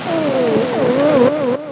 Some parts of a song I am working on made from snippets of Thomas Edison's recording of train tracks with a phonautograph. Loops are 140 bpm.